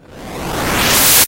po-12-noise-3
Recorded from the drum machine PO-12 by Teenage Engineering.
lofi, drums, pocket, lo-fi, kit, 12, machine, drum, teenage, po-12, engineering, po, operator